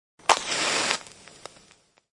Match cracking/Craquage d'allumette
crackle, fire, flame, match